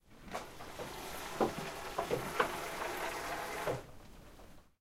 goats milking pouring out milk from bucket
Farmer milking a goat by hand. Bucket is half-full of milk. Goats and bucket stands on a wooden platform. Farmer pours out the milk from the plastic bucket to filter it.